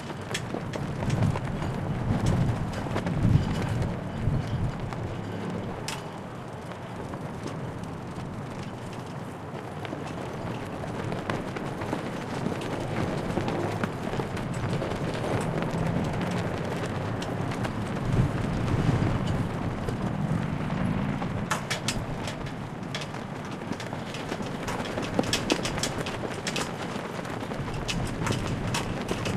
Noon atmo on the National Mall in Washington D.C. next to the Washington Monument. The recorder is on the inner ring path around the monument, facing south towards the Monument itself. It is very windy, and gusts of wind are rattling the flagpoles placed around the Monument.
Recorded in March 2012 with a Zoom H2, mics set to 90° dispersion.